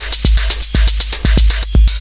Thank you, enjoy